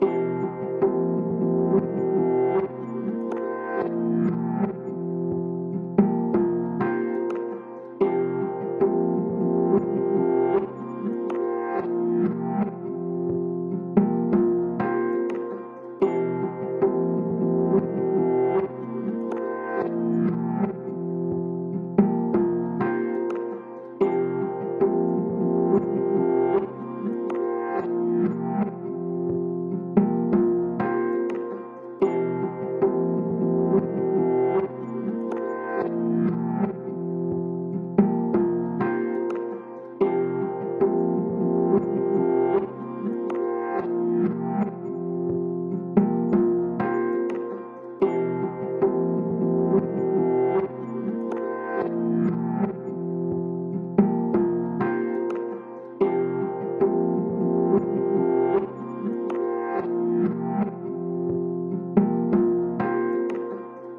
Abstract Loop
ambience, atmosphere